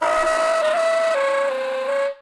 A brief fluttered lick on alto sax.
flutter, howie, lick, smith